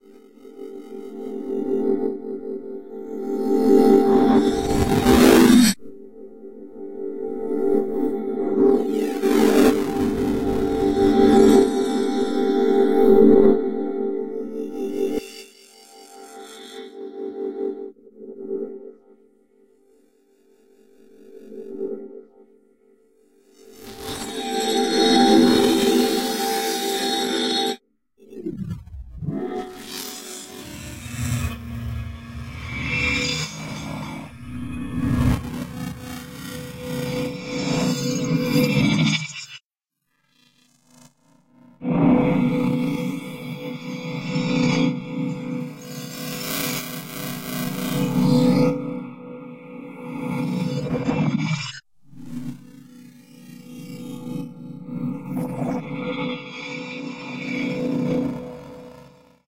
Hells Bells - 06
Bells echoing in the dark recesses of a sticky ear cavern.
granular, discordant, distorted, hells, grain, chimes, dissonant